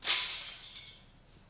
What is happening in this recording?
Glass smashed by dropping ~1m. As recorded.
field-recording, glass